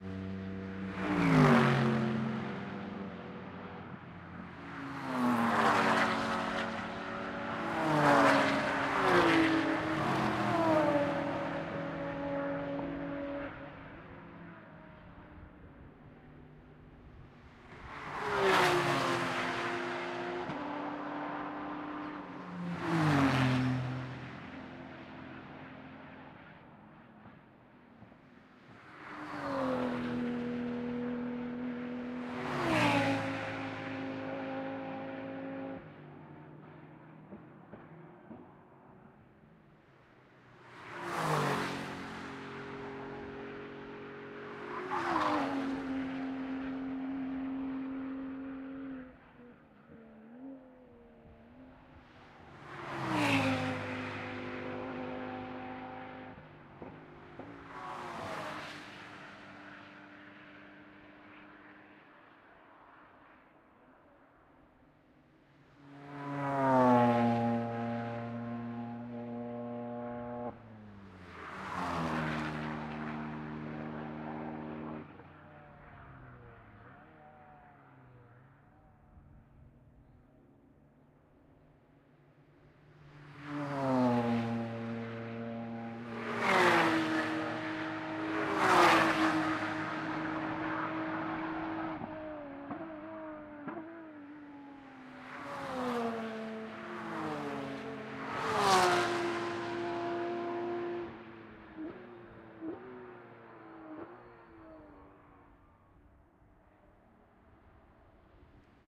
Car race, Nordschleife, VLN, several cars passing by, tyre squeal 02
Several race cars passing by at a VLN race at the Nordschleife, Germany
Recorded with a Zoom H1 (internal mics)
by, Car, cars, Nordschleife, passing, race, several, squeal, tyre, VLN